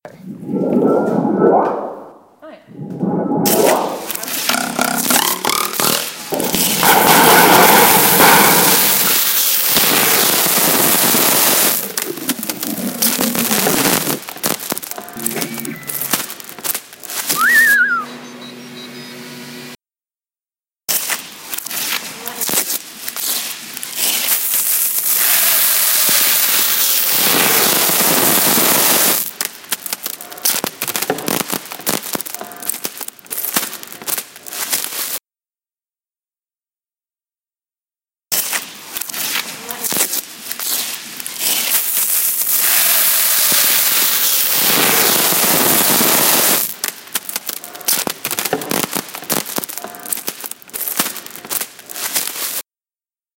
Glad wrap sound recordings